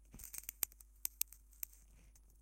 Ice Crack 3
Sound of ice melting and cracking, recorded using a piezo element frozen in a block of ice and a zoom h6.
melt, ice, cold, crack, frozen, snap